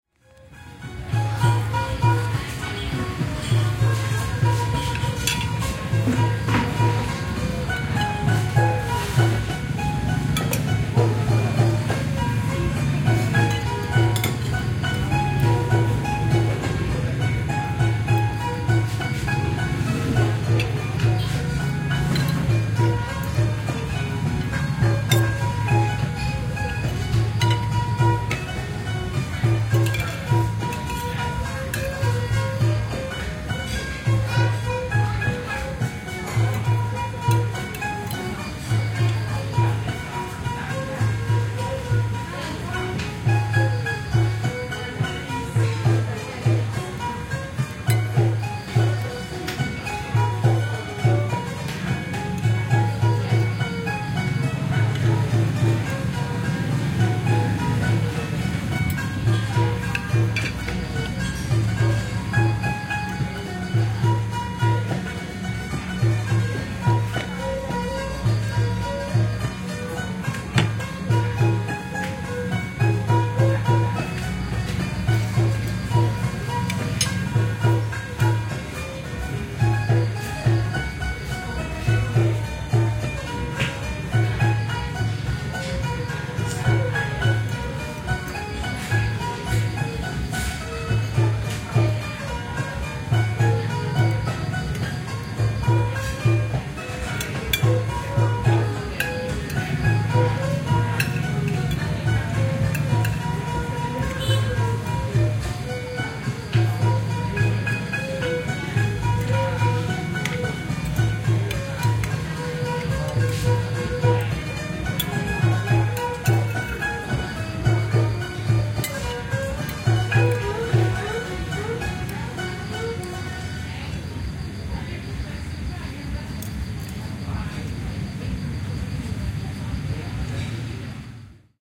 Streetmusic in front of a restaurant in Siem Reap / Cambodia,
also containing sounds of the restaurant (plates, glasses)
Date / Time: 2017, Jan. 02 / 21h58m